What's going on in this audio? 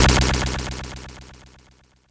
TX81z FX 01
A noisy video-game type hit sound. Created with a Yamaha TX81z FM tone generator.